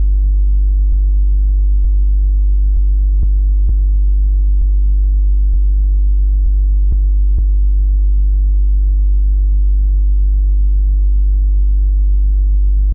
G2 FM LFO
A Sound made with Sytrus, with some weird frequency ratio and Keytracking...
blab
G2, FM